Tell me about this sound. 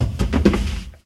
Sturz - aus dem Laufen 2
Sound of a person beeing thrown off it's feet
Version 2
fall, thrown-off, walking, person, field-recording